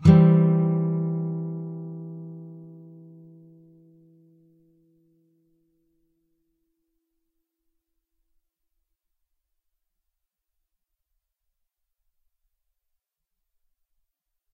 Eminsus4 3strs
A (5th) string 7th fret, D (4th) string 5th fret, G (3rd) string 5th fret. If any of these samples have any errors or faults, please tell me.
bar-chords, acoustic, chords